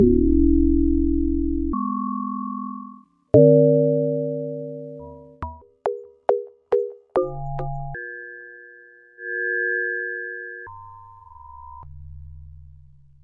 bass space 001
bleeps and bloops made with reaktor and ableton live, many variatons, to be used in motion pictures or deep experimental music.
pad, reaktor, dub, bleeps, sounddesign, bass, experimental, space